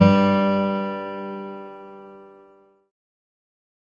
Martin D45 acoustic single note
single, acoustic, note